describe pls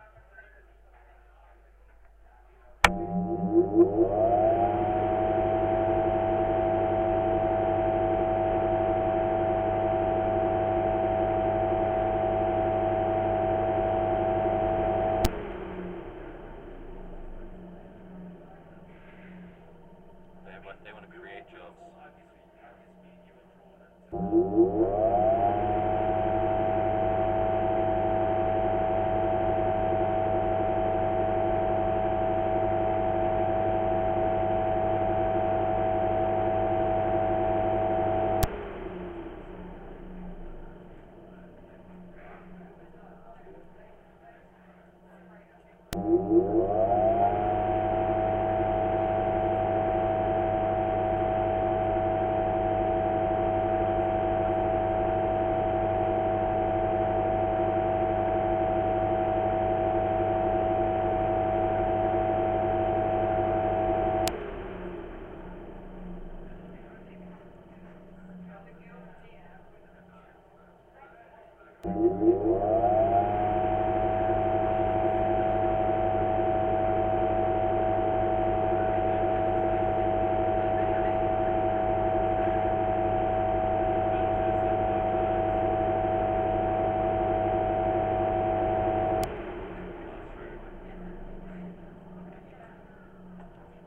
Placed some piezo-mics on the back of a solder fume extraction fan to try listen in on the fan accelerating and decelerating. Was not as effective as hoped. Managed to pick up chatter in the background.